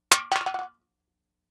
Empty soda can dropped on a hard surface.
Foley sound effect.
AKG condenser microphone M-Audio Delta AP